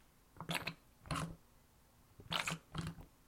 Pumping the soap dispenser recorded with laptop and USB microphone in the bathroom.
pump, soap